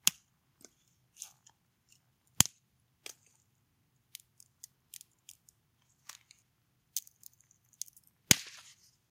Stick Snap and Crackle
Snapping a stick. Multiple small snaps and a bigger snap. Cleaned in Audacity.
nature, break, snap, stick, wood, crackle